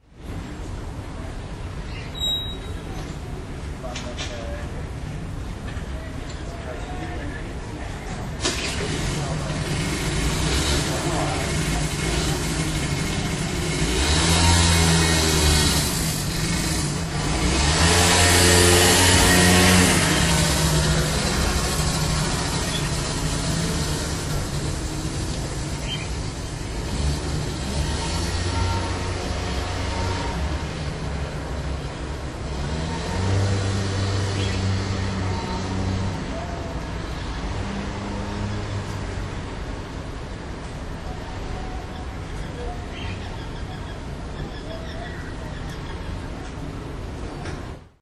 One of my neighbours leaving home on his moped on a rainy day.
city, engine, field-recording, human, noise, street, street-noise, traffic